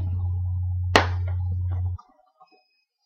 Foley- Face Punch #2
This a sound effect I made that I find actually quite well, considering I just started foley.
Hit, Punch, Face, Hard